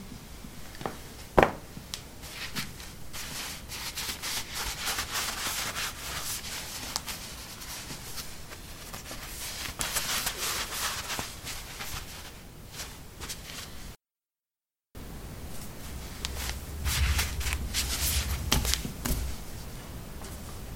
ceramic 03d slippers onoff
Putting slippers on/off on ceramic tiles. Recorded with a ZOOM H2 in a bathroom of a house, normalized with Audacity.
footstep, footsteps, steps